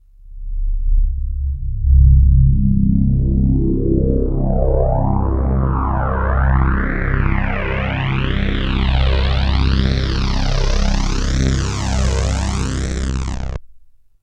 A thick, rich, chorused rising filter sweep with amplitude modulation from an original analog Korg Polysix synth.
analog, bleep, chorus, fat, filter, fx, korg, low, polysix, rise, slow, sweep, synth, thick, tremolo, warm